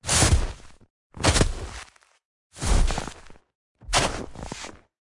FOOT STEPS SNOW HEAVY
I layered a bunch of sounds and processed them to make them sound more impactful, heavy footsteps walking on thick snow,
Footsteps, SFX, Foley, Wet, heavy, boots, snow